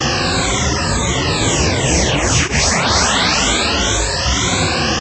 Energy sound created with coagula using original bitmap image of myself.
ambient, synth